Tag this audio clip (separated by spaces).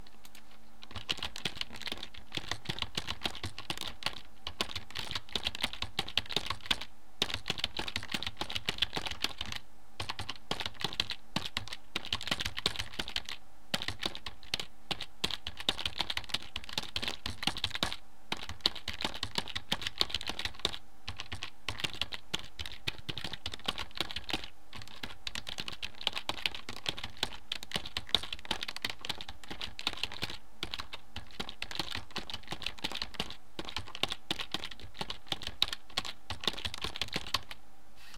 keyboard lofi radioshack